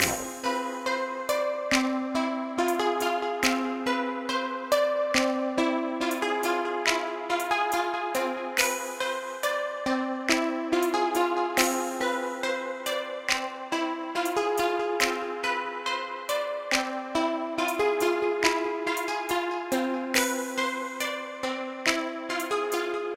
Time Before Loop {Hip-Hop}
Enjoy, feedback is great!
[Fl studio, used Sylenth 1 along with AutoGun. Drum pack - "DoubleBeats-Hard Trap Kit Part 2"] 9/5/2014 Florida
100, 2014, clap, Deep, Fight, Fl, Florida, Free, Gangster, Hip-hop, Kick, loops, Medieval, Music, New, Rap, snare, studio, Swords, Sylenth1, Trap, Trippy